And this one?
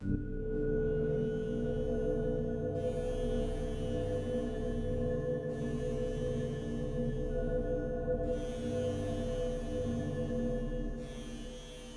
16 ca pads
sci fi machine atmos
amb, ambiance, ambience, ambient, atmo, atmos, atmosphere, atmospheric, background-sound, city, fi, general-noise, horror, music, sci, sci-fi, score, soundscape, white-noise